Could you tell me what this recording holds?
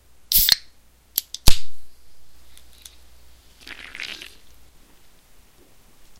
Opening and drinking a soda can.